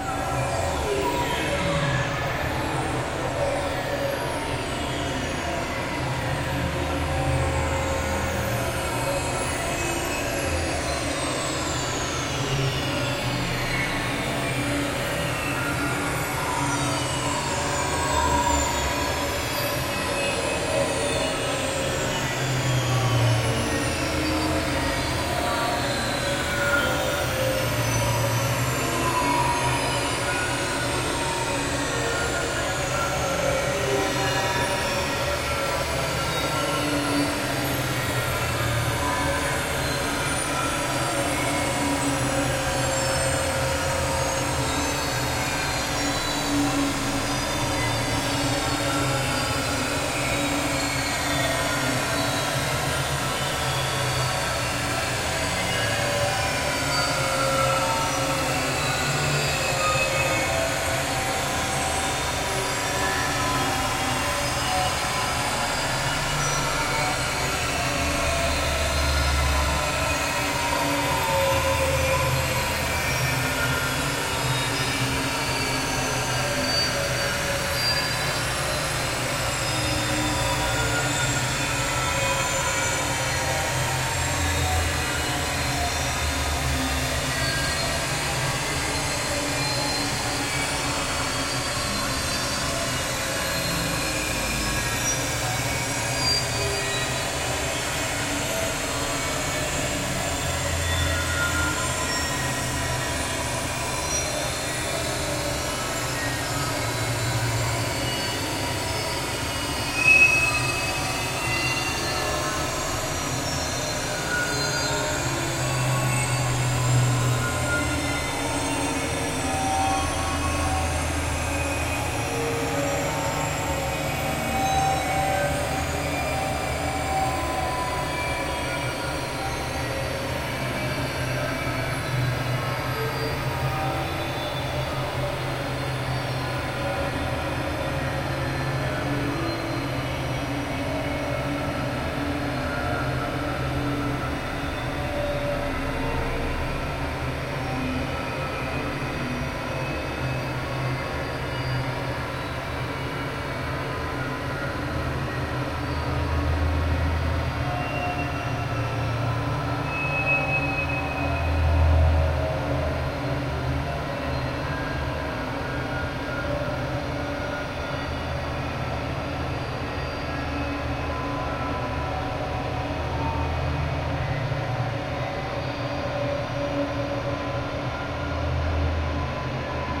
industrial noise background atmosphere
This is industrial background: sound environment of working machines in factory.
Some of machines are running continuously, some of them are just start or stop.
background, noise, machines